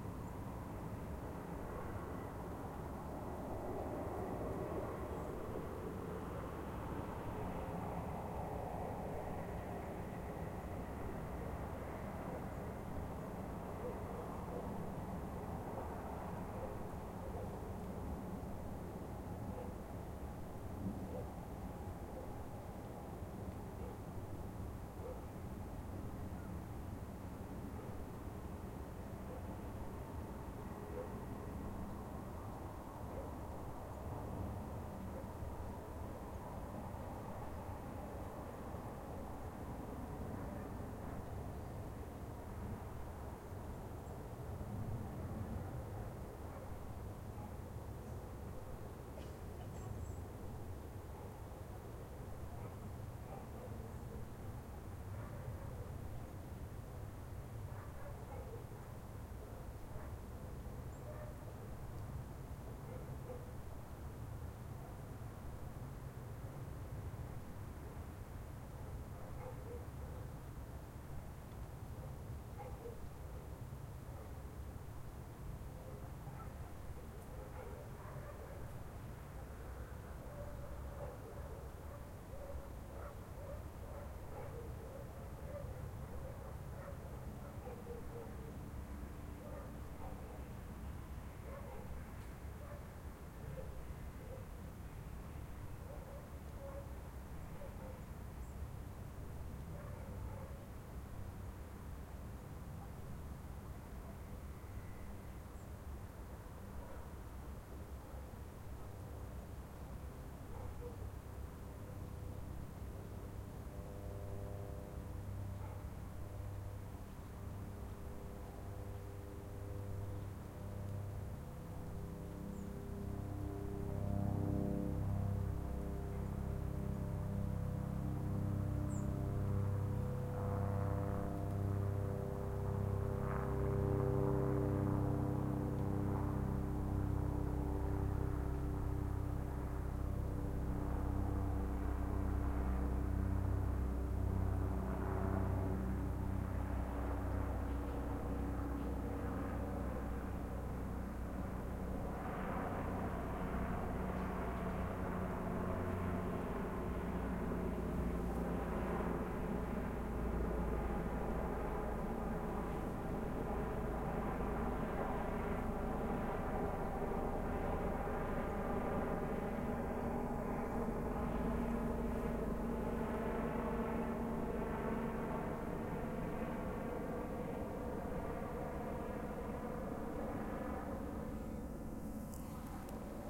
Distant recording of city also Helicopter flyover.